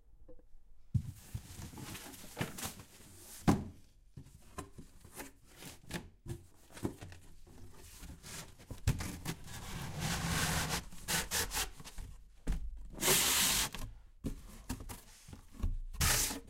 Sound of a opening cardboard box